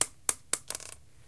basically, this is the recording of a little stone falling on the floor, faster or slower, depending on the recording.
rock, stone